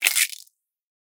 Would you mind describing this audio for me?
A crunchy squish sound, as if someone were stepping on a big bug. It is actually someone stepping on an egg-shell. See the pack description for general background. This one is more smooth and higher in overall pitch, but otherwise very similar to "Smush" in this same pack.
bug, crack, crackle, crunch, crush, eggshell, egg-shells, grit, quash, smash, smush, squash, squish